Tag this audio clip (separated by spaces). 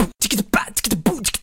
Beatbox kick percussion snare